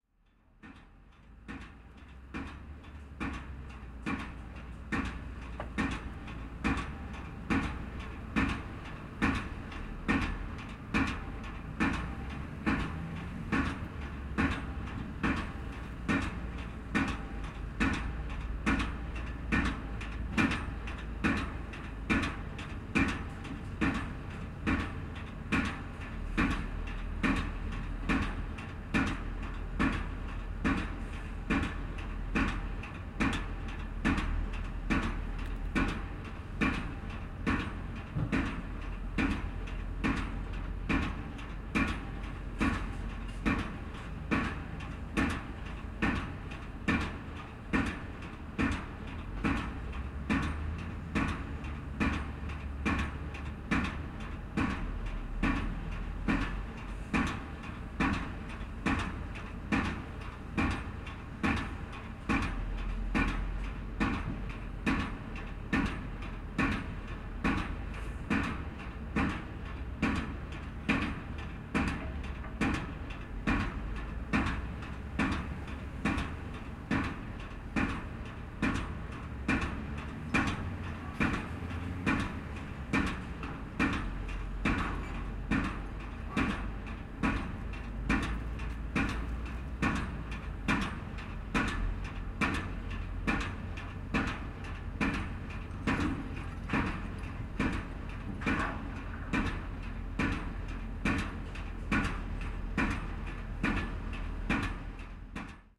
110817- piledriver from cab
17.08.2011: eighteenth day of ethnographic research about truck drivers culture. Renders in Denmark. The river port in the center of Renders. Unbelievable noisy and beautiful sound of piledrivers and drill. During the unload some steel staff. Sound recorded from the truck cab perspective.
drill, drone, field-recording, machine, noise, piledriver, rhythm